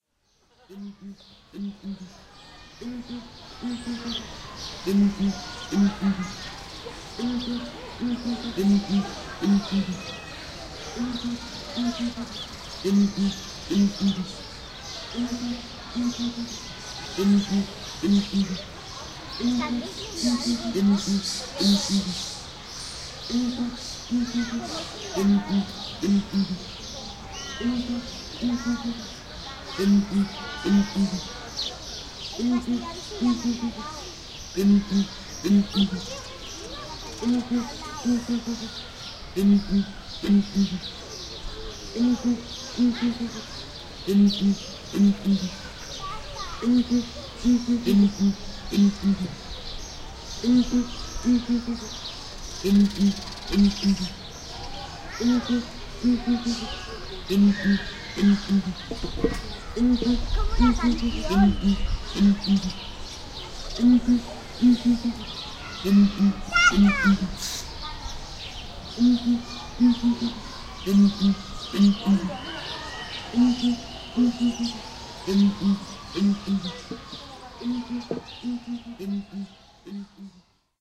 Calao Terrestre 04
Song of two males of Southern Ground Hornbill (Calao terrrestre, scientific name: Bucorvus leadbeateri), and ambient sounds of the zoo.
Barcelona, Calao, Spain, Zoo, ZooSonor, animals, birds, field-recording